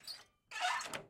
Old Metalic Door Handle Open
Door, Handle, Metalic, Old, Open, close